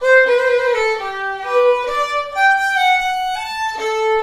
Ungdoms synden (translates to: The sin of adolescence) is a traditional swedish melody (style: polska) from Boda in Dalarna Sweden.
The melody is compsed by Röjås Jonas, aledgely played to him in his old age, by a fellow fiddler. "Who has made that song?" Jonas asked. "You did - many years ago" the player replied "That must have been my sin of adolescense" the composer replied.
This is just the first phrase of the melody recorded by me.
The Boda polska rythm is based in 3/4, but somewhat unevenly spaced.
Every village in the neighboorhood have their distinct muiscal dialect, in the way of how to play a polska. The Boda musical dialect is reputaded throughout Scandinavia.
Recorded with Zoom H4 in my living room Copenhagen.